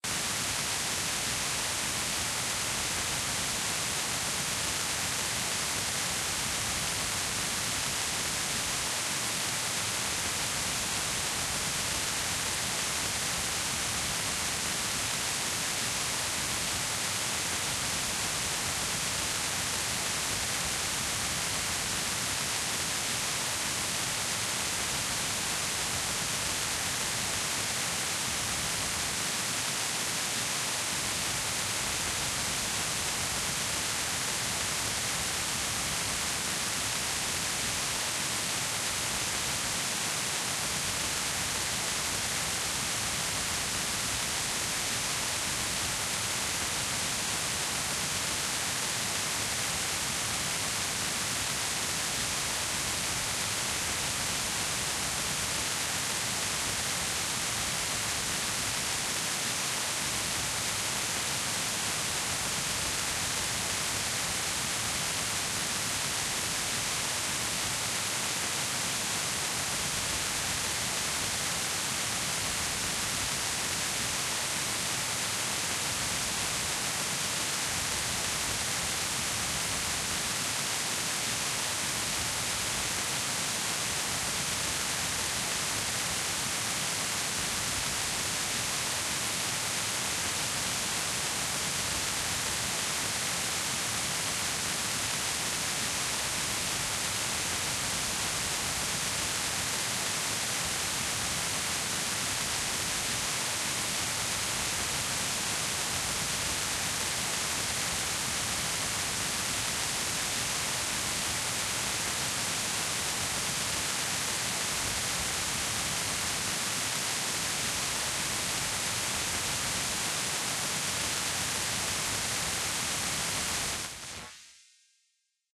Rain sound synthesized with ableton's operator plugin